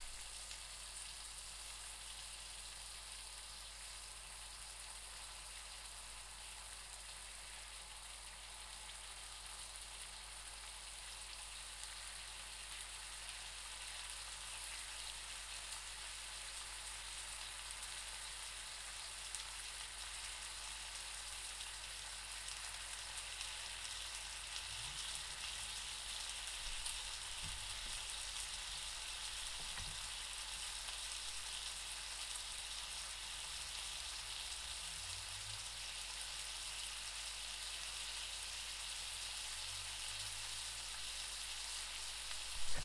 Bacon cooking in a cast-iron pan
Bacon sizzling on a stove. Minimal pops and splatters, mostly a low, constant sizzle. 60Hz band was cut due to fridge noise next to the pan. Recorded on a Tascam DR-40X and edited in Audition.
bacon, cook, cooking, food, fry, frying, kitchen, pan, sizzle, sizzling, stove